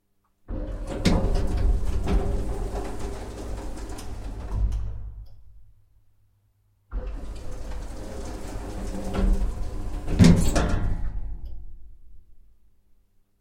elevator inside doors open close
The sound of opening and closing elevator doors, recorded from inside the elevator.
Recorded with the Fostex FR-2LE and the Oktava MK012 microphone.